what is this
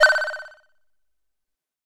Analog Synth 01 A#
This sound is part of a pack of analog synthesizer one-note-shots.
It was made with the analog synthesizer MicroBrute from Arturia and was recorded and edited with Sony Sound Forge Pro. The sound is based on a triangle wave, bandpass-filtered and (as can be seen and heard) pitch modulated with an pulse wave LFO.
I've left the sound dry, so you can apply effects on your own taste.
This sound is in note A#.